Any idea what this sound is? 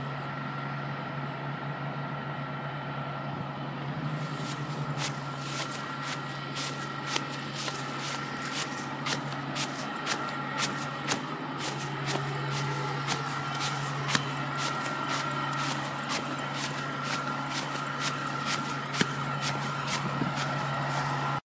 The sound of me spinning and stomping my sandals through some grass about 3 inches in length.
Recorded in Winter Park, Colorado, United States of America, on Wednesday, July 17, 2013 by Austin Jackson on an iPod 5th generation using "Voice Memos."
For an isolated sample of the bus in the background, go to:
step, foot, footsteps, grass